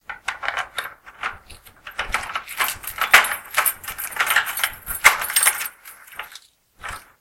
G7 opening door with keys
unlocking a door with keys
entrance, door, unlocking